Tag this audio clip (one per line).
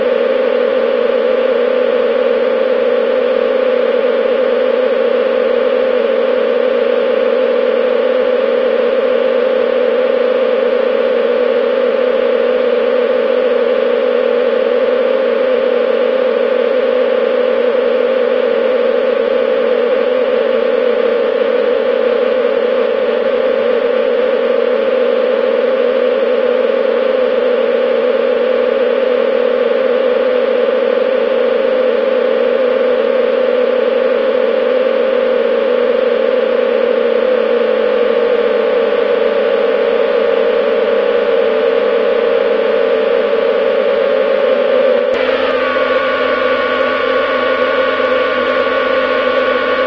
buzzing
granular
synthesis
voice